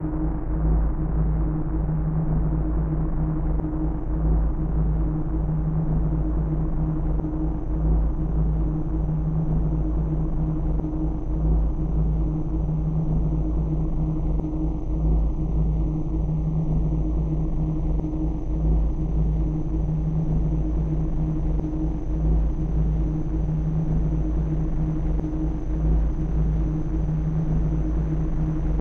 Organic or industrial drone... All sounds were synthesized from scratch.
atmosphere, dry, fx, hollow, insects, minimal, minimalistic, noise, raw, sfx, silence